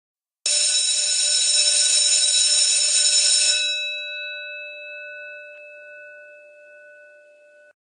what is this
Made from recording an actual school bell.
school, bell, ring